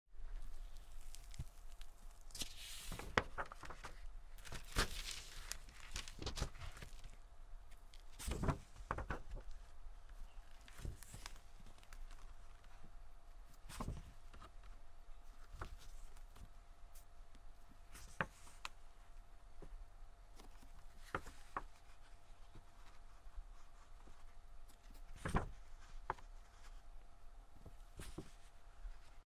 Very old book having its pages turned.